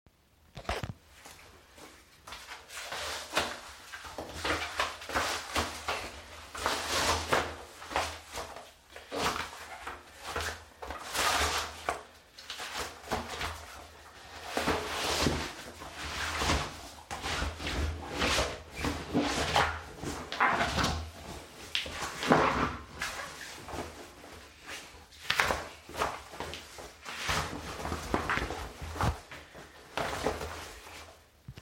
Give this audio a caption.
Sounds of putting on a wetsuit with extra squeaks and rubs to get different sounds out of it.